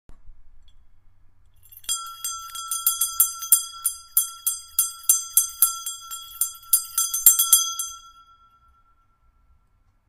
Bell, ringing, ring
Bell, ring, ringing